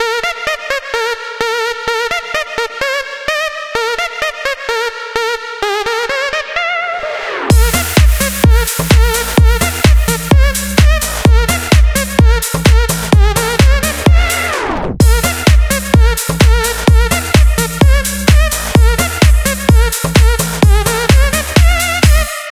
Free music 128bpm
This sound was created with layering and frequency processing.
BPM 128
4x4-Records, Background, Big, Club, Crazy, Dance, EDM, Electro, Free, House, Loop, Room, Sample, Vintage